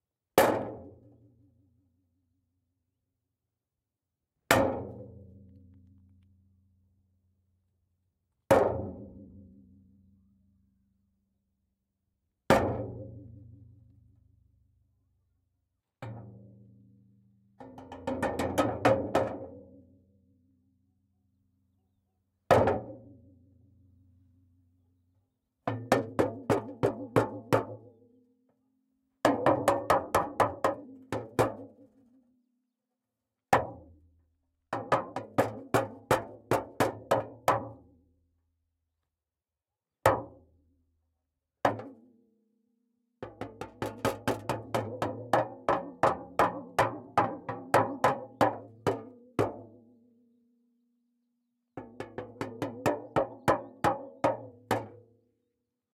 Metal hit
clang, hit, metallic, steel, strocke, iron, metal